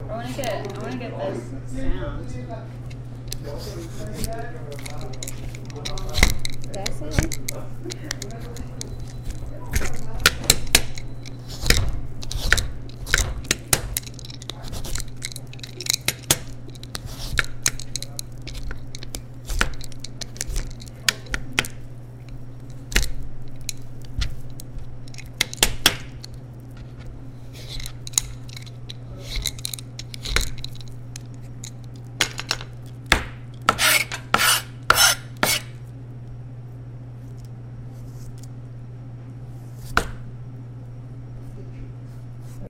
Papaya sound
Counter attendant at a Mexican market cutting a papaya.
cooking, cutting, food, fruit, papaya, prep, skinning